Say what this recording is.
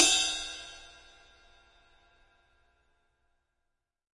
right ring
rides - bells, ride, bell, dw, ludwig, yamaha, tama, crash, cymbals, drum kit,
drums, percussion, sabian, cymbal, sample, paiste, zildjian, pearl
bell, cymbal, drum, ludwig, paiste, sample